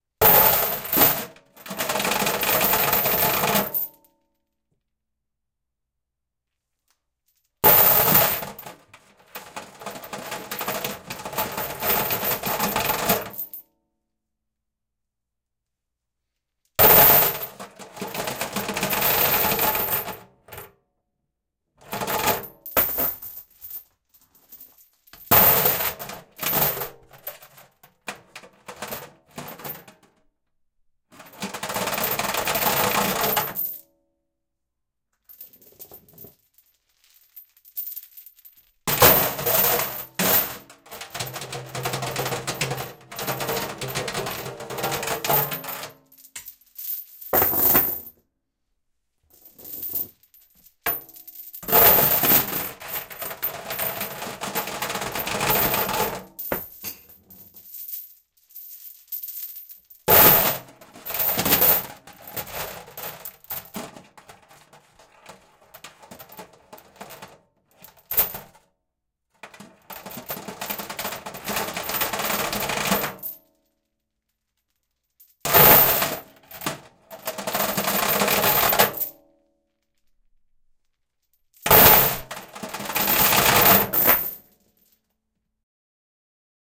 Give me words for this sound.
Dragging a chain out of a sink
Recordings of a heavy chain being drug out of a kitchen sink. Pretty loud and sharp-sounding. Most of the brightness is around 14k if you want to EQ it out. Recorded with two Kam i2's into a Zoom H4N.
bright, chain, dark, drag, dungeon, freaky, holy-crap, kitchen-sink, long, loud, sharp